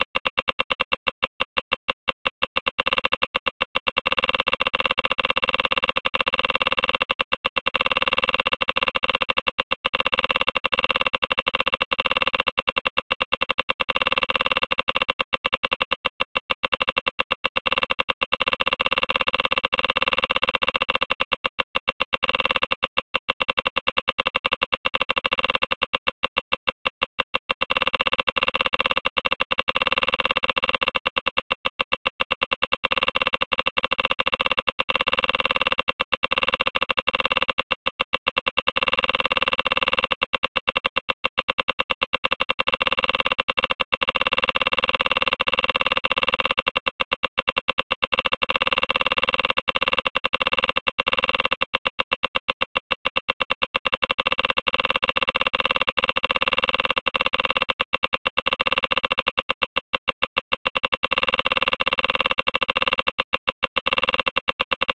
Geiger Counter Clicks
The sound of a geiger counter. Simulated, using Benboncan's beautiful click sound and bash and sox to create a semi-random, organic feel to it.
Plaintext:
HTML: